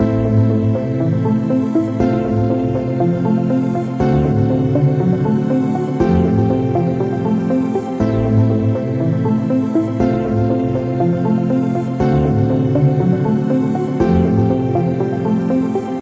Stranger Things Animated Dark Chord Seq Key: F - BPM: 120